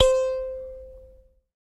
SanzAnais 72 C4 bzz
a sanza (or kalimba) multisampled with tiny metallic pieces that produce buzzs